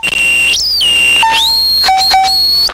About 10 years ago my friend gave me a guitar he found in the garbage. This is one of the horrible and interesting sounds it would make. These sounds were recorded originally onto a cassette tape via my Tascam Porta07 4-track. This sound is longer, gets very high pitched and you can hear some notes being played.
noise, distortion, harsh, broken, feedback, guitar